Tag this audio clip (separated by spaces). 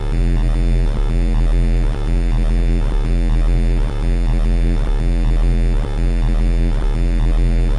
alarm industrial loop